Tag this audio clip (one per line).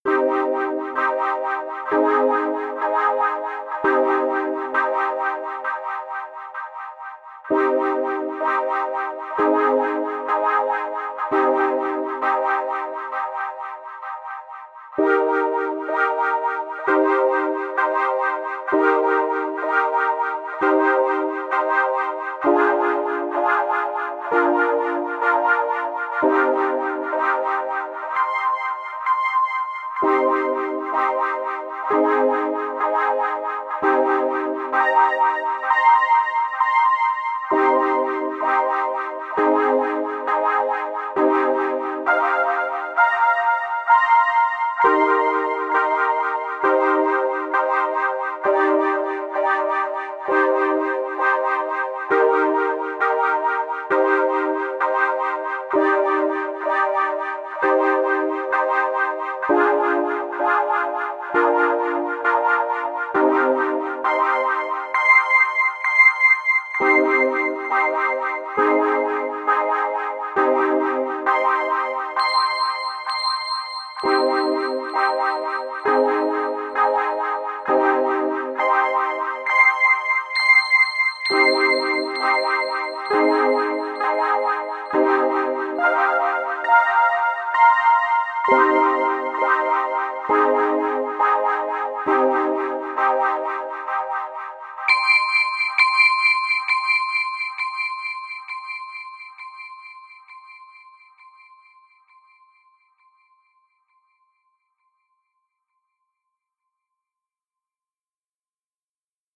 websynths
synth
synthesizer
echo